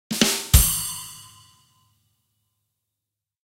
Joke drum fill 05
A short drum fill to mark when a good point has been landed in a joke. Each with a different variation.
Recorded with FL Studio 9,7 beta 10.
Drums by: Toontrack EZDrummer.
Expansion used: "Drumkit from hell".
Mastering: Maximus
Variation 5 of 10